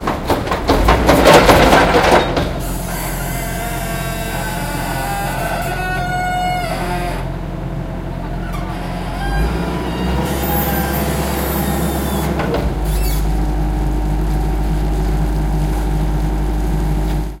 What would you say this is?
creaking mini excavator
creaking sound of an excavator fixing a street in the old the center of genova near Casa Paganini.